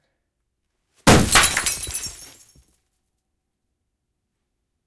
neon tube smash explode shatter glass debris
debris, neon, smash, tube